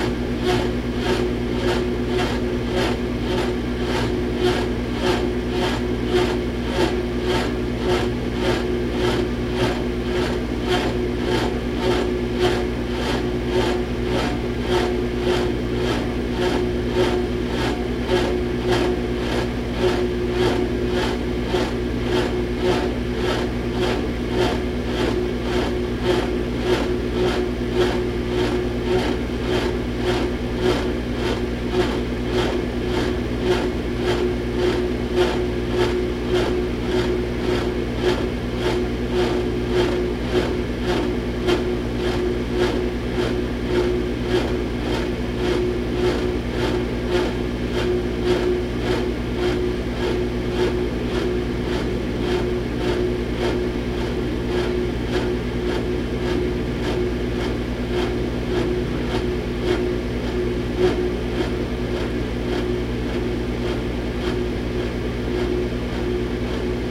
Ever wonder what would happen if your drunk college roommate set fire to a microwave by cooking a compact disc, ripped the front door off the oven, plugged it back in and doused the room with radiation? Well, now you know what the aftermath sounds like.